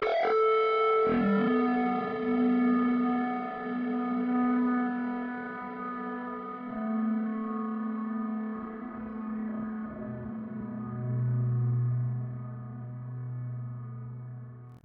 An emulation of an electric guitar synthesized in u-he's modular synthesizer Zebra, recorded live to disk and edited in BIAS Peak.